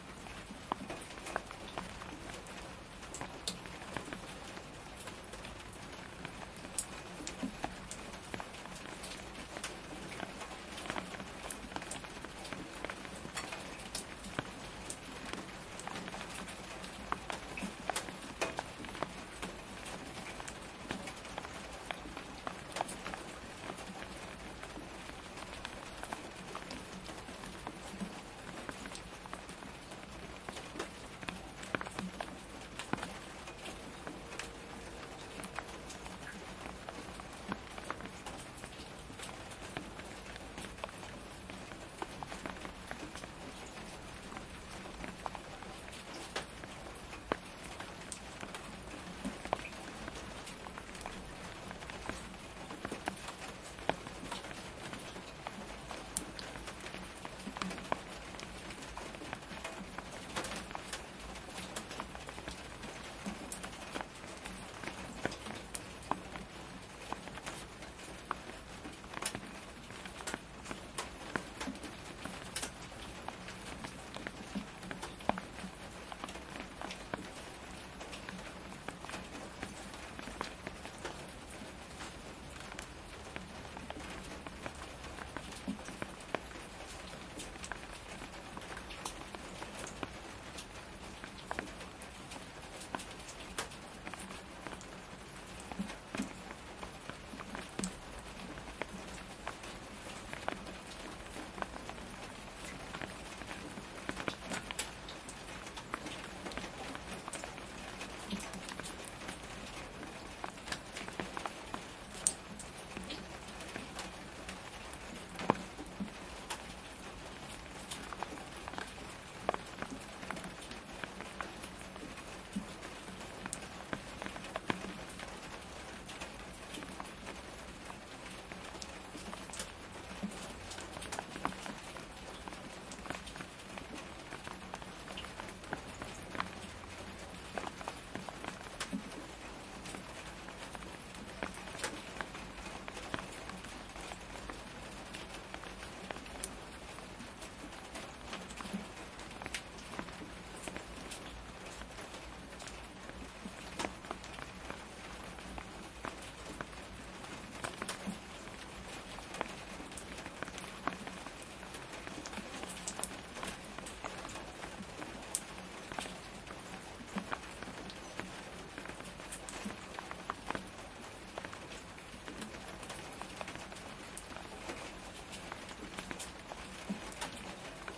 more raining recorded tonight
field-recording, rain, weather